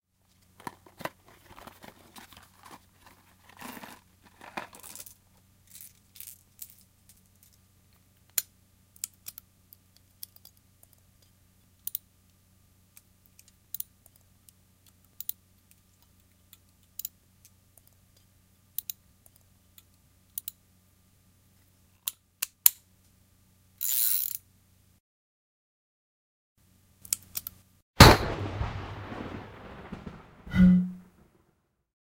Long title... Masternate08 asked about how to make the sound of a revolver spinning - well, I suggested a rachet wrench. Fine... but I had to try it myself - I must admit that I don't own a gun (even though I live in Texas)... I can only imagine what it sounds like to load a revolver. A low rumble was added from a clap of thunder as well as a low tone. The sound at the end is a nearly empty bottle of Malibu Rum. Recorded with ECM-99 to Extigy Sound Card.